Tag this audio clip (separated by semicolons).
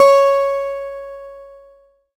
acoustic; guitar